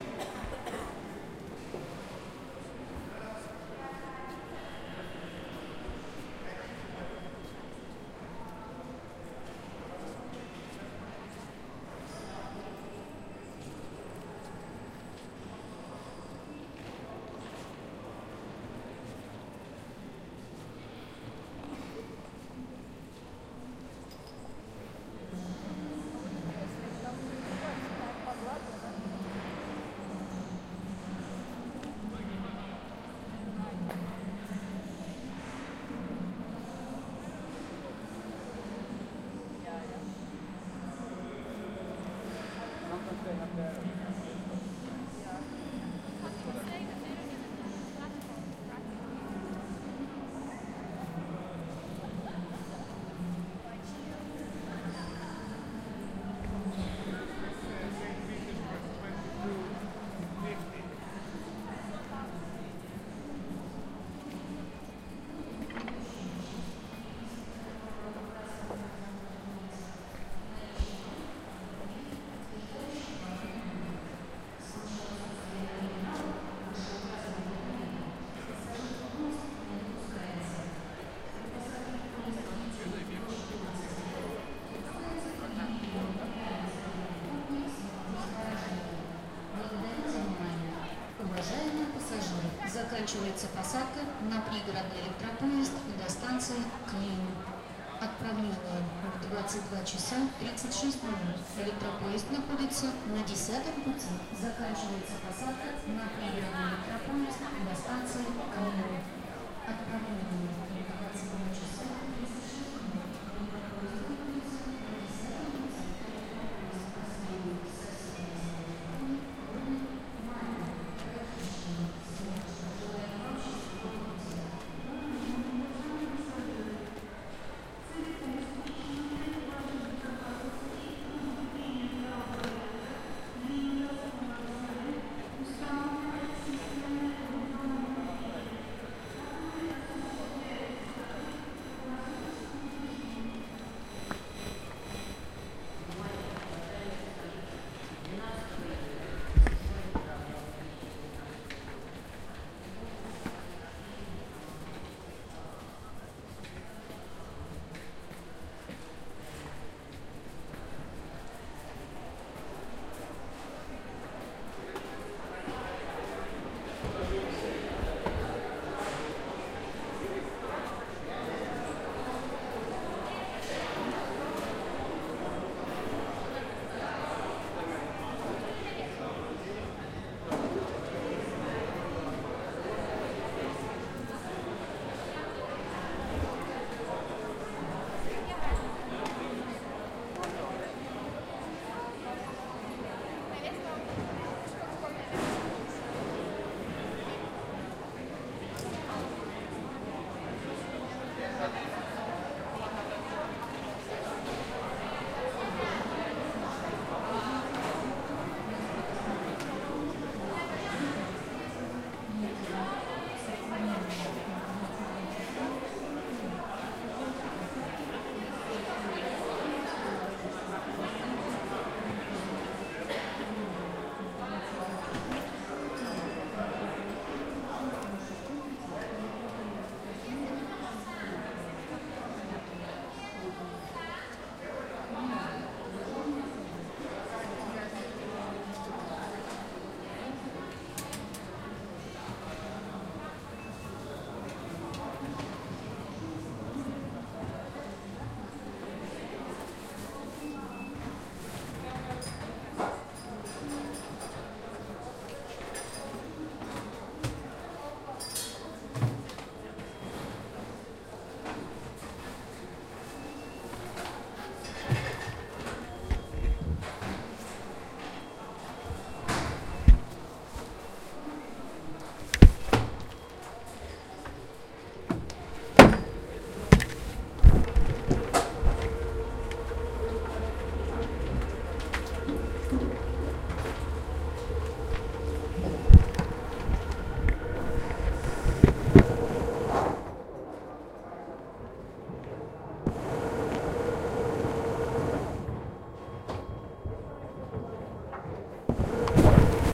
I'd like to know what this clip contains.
Leningradsky railway station hall, echoes. Moscow
Station announcements, movement and distant chatter. People taking English in a Finnish accent. Mic passes X-ray machine. Recorded with Tascam DR-40.
announcement, chatter, departure, english, field-recording, finnish, leningradsky, moscow, railway-station, russia, train